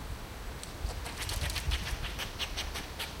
angry-squirrel1
That grey squirrel again, shorter length. Binaural, on a Zoom H1.
park, grey, binaural, chatter, squirrel, angry